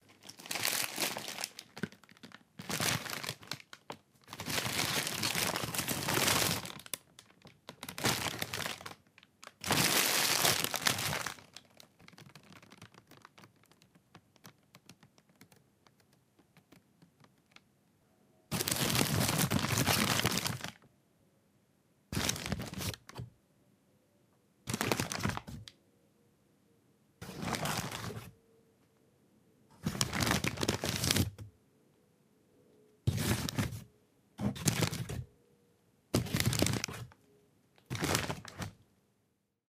Various sounds of a paper bag being moved and crumpled for you to chop up and use.
paper, crumple, scrunch, bag, rustle, crunch